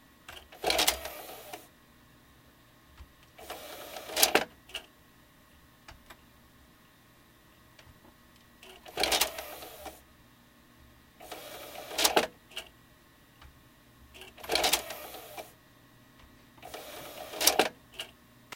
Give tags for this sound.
tray; cd; opening

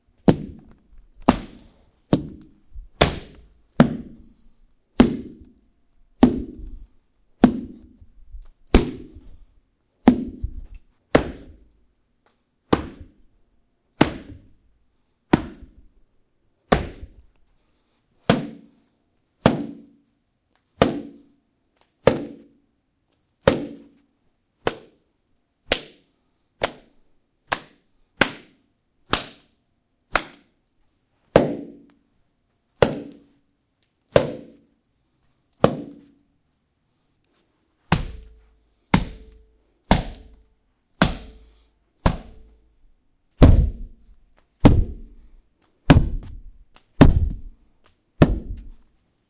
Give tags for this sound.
whack; belt; hit

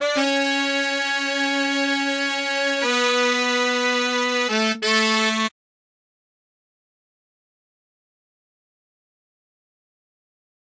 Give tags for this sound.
Samples; 090; 14; Roots; Bmin

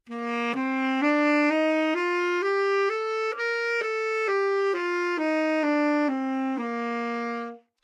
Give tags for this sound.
AsharpMajor; good-sounds; neumann-U87; sax; scale; tenor

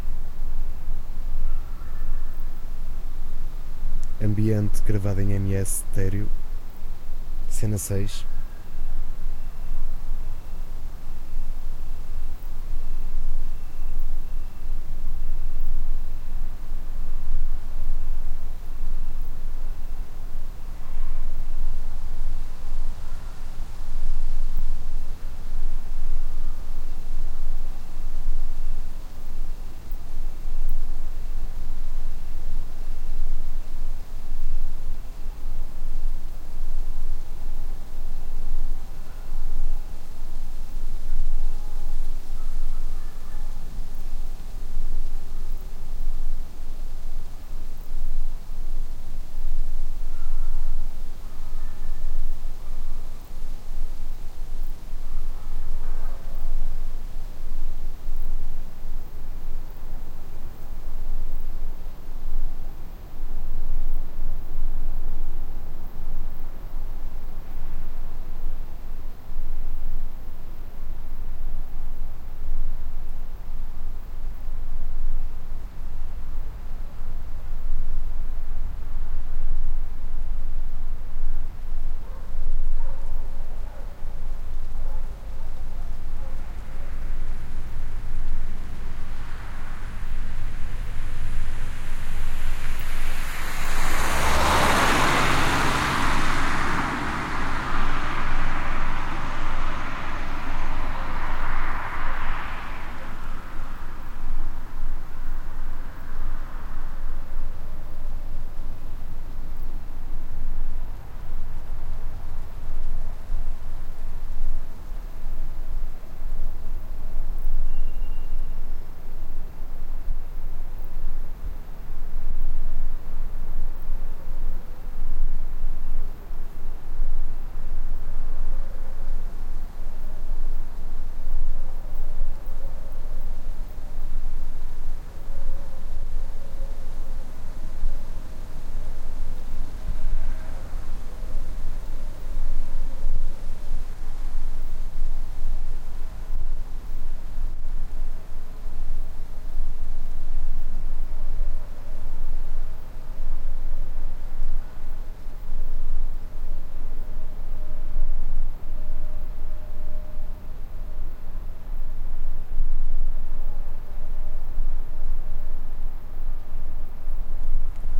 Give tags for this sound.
ambiance; ambience; ambient; atmo; atmos; atmosphere; background; background-sound; field-recording; general-noise; ms; pasture; rural; schoeps; soundscape; stereo; white-noise

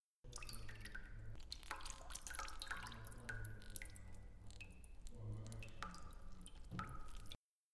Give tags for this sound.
upf-cs13; water; movement

